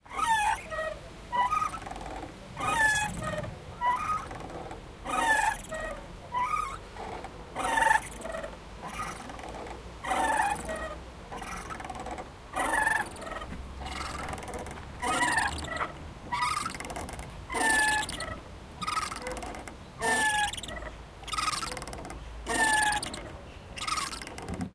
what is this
some swing squeaking a lot
park, playground, recording, squak, swing